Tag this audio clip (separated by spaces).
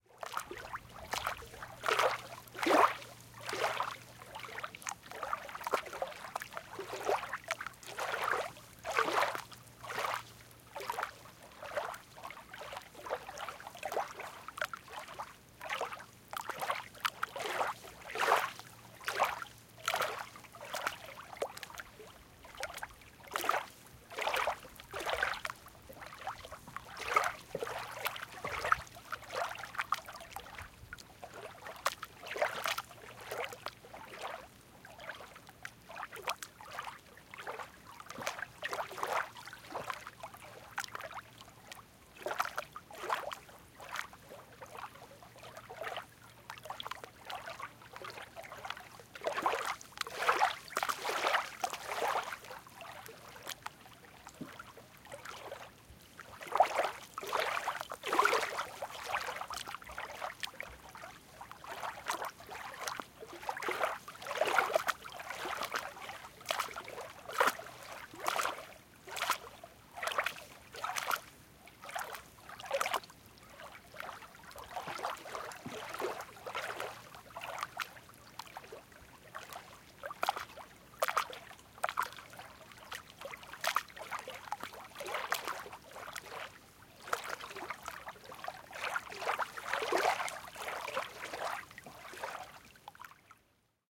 shore sand atmo peaceful sea waves beach coast ambience field-recording chill atmosphere